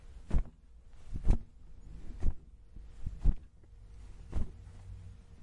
Este sonido fue grabado para la utilización del efecto sonoro de una cortina de tela abriéndose.
Fue grabado con un pedazo de tela sacudiéndose.
cortina, sacudir